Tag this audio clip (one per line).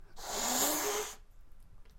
pet
hiss
cat
noise
anger
fury